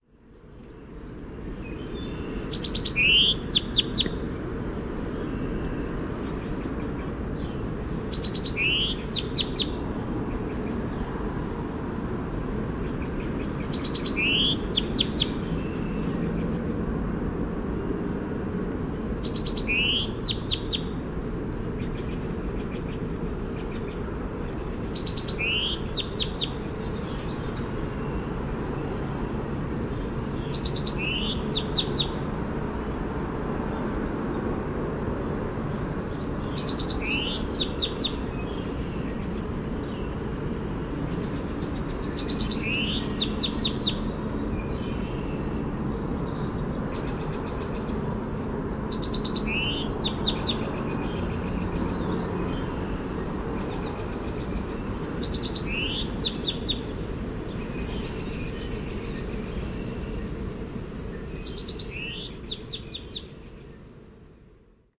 agua23 14may2008
san-mateo-county, alpine-lake